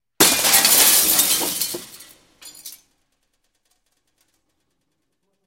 Windows being broken with vaitous objects. Also includes scratching.
indoor, window